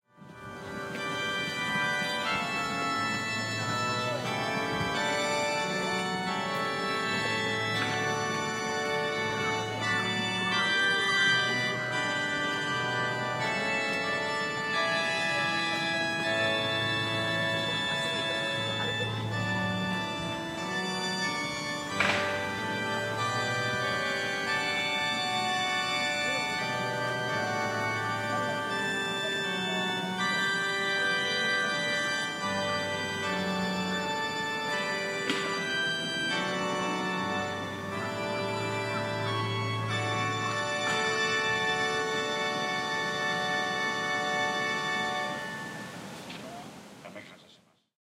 Tokyo - Ueno Park Organ
An organ being played in Ueno Park as part of a Saturday afternoon religious ceremony. Has some general park ambience. Recorded in May 2008 on a Zoom H4. Unprocessed apart from a low frequency cut.